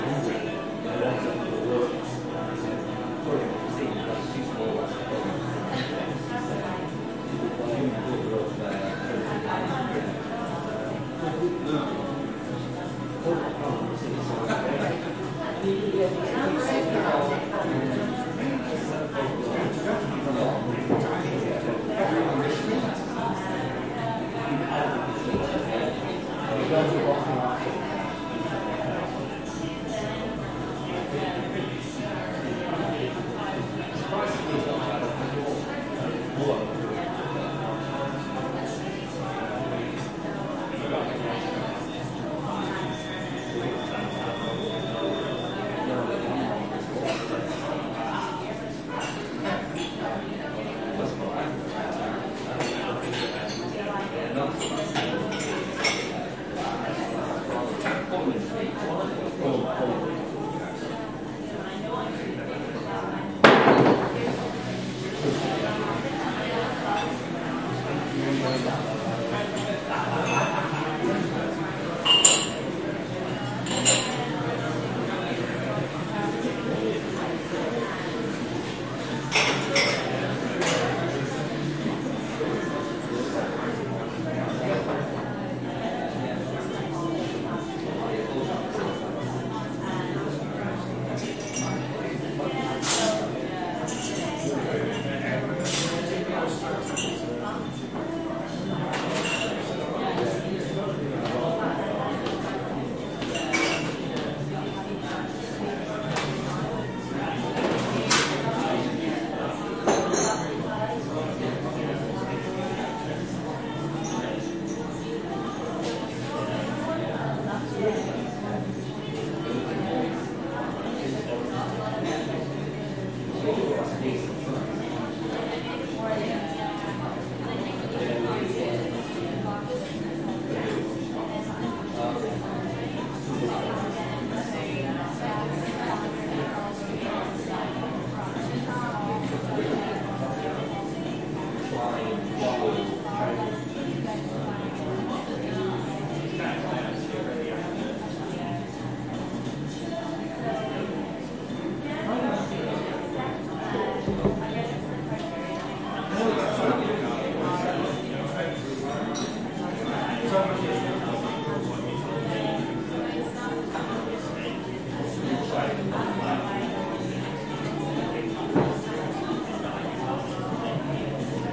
Ambience Coffee Shop 3
ambience, atmos, atmosphere, coffee, general-noise, shop